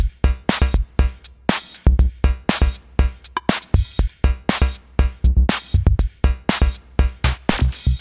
duppyElectro02 120bpm
Electro style beat medium weight beat with TR808-style drums with ticks, clicks, beeps, and radio static.
120bpm, beat, break, breakbeat, drum, electro, electronic, loop, radio, statictr808